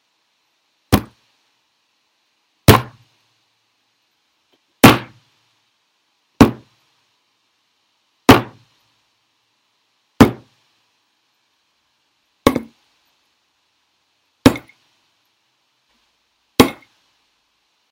various sounds of hitting a solid object on a solid surface